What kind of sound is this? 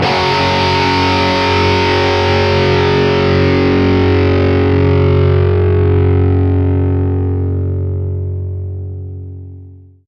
F#2 Power Chord Open
Electric-Guitar, Distortion, Melodic